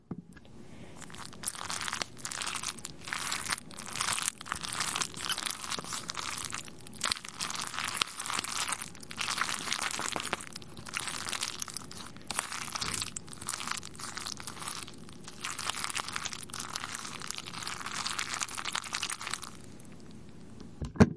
Mac n Cheese being stirred
Me stirring a cup of Kraft Mac 'n Cheese
stirring, Mac-n-Cheese, food